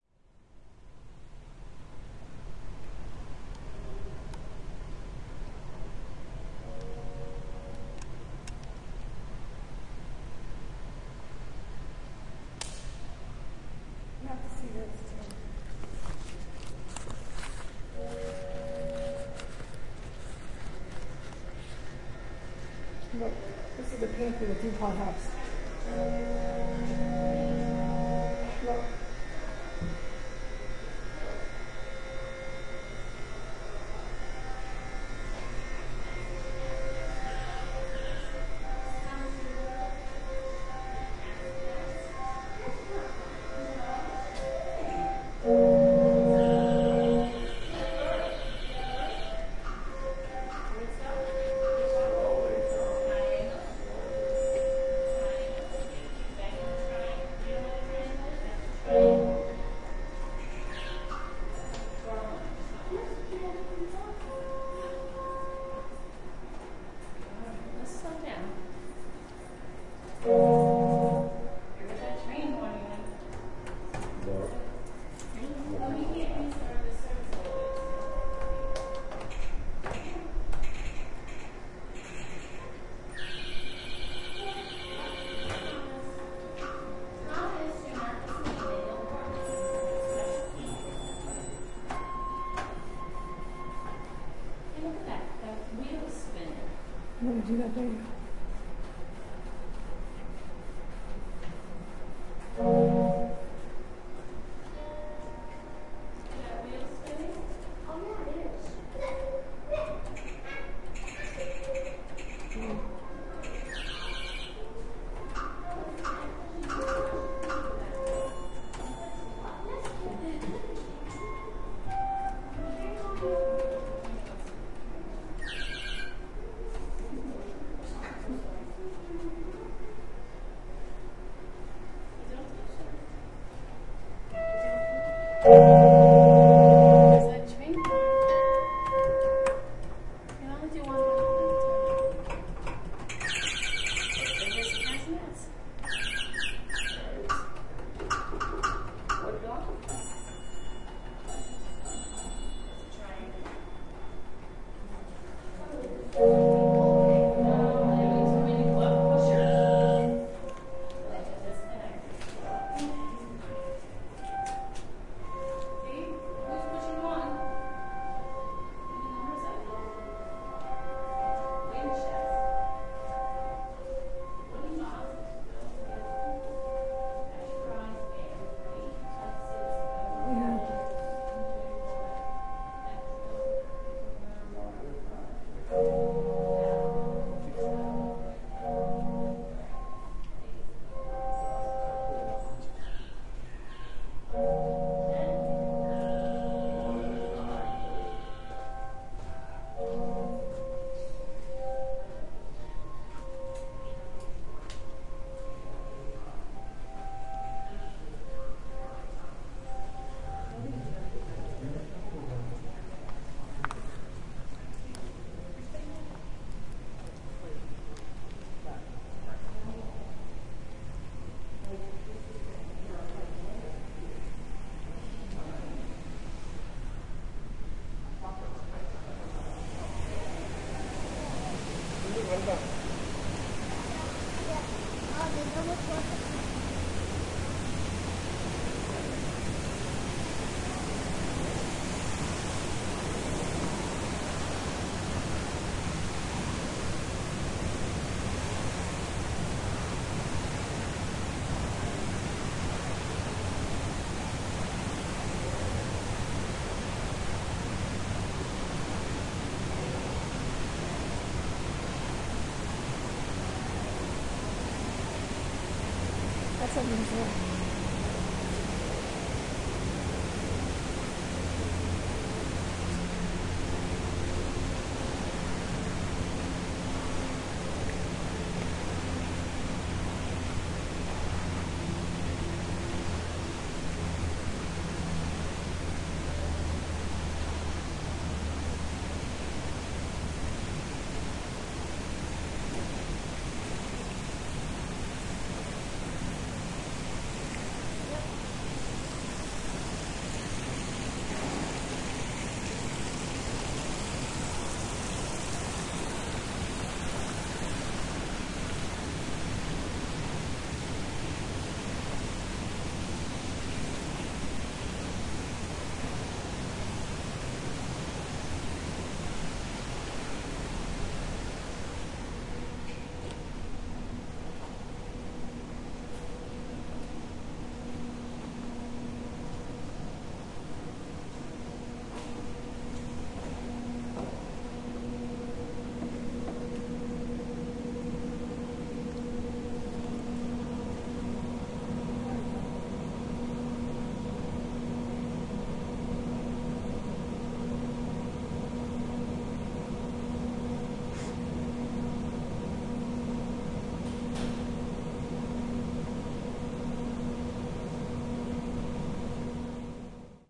ballroom and beyond
To the rear is a large paneled room- the ballroom. You hear some murmuring voices and a distant train whistle(?), and a snap at 12 and a half seconds which reverberates. We move through hallways- the whistle gets louder and we hear some other sounds- bird-whistles, a wood block. At 2:15 we are right at the source of the "train whistle", a hands-on exhibit of various air-powered devices explaining how pipe organs work. Kids are playing, pushing the buttons to make the sounds.
We move away down another hallway, passing back through the ballroom, and out into the conservatory, with the sounds of fountains. We pass unusual plants and more fountains, then round a back corner where there is some construction blocking our progress. You hear machinery whining.
fountain, whistle, longwood, field-recording, conservatory, ambience, garden